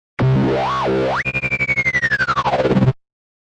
dubstep bass 2
another dubstep sample made by me
bass, dubstep, electro, electronic, loop, sample, synth, techno, trance